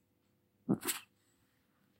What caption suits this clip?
Cat blow
How it was created: Recorded by me in a Samsung J5. It is an excerpt of a sound, but I don't remember wich (It is not really the claw of a cat)
Software used: To mute the noise, cut it and export it.